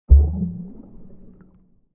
A short out-take of a longer under water recording I made using a condom as a dry-suit for my Zoom H4n recorder.
Recorded while snorkeling in Aqaba, Jordan. There we're a lot of beautiful fish there but unfortunately they didn't make a lot of sounds.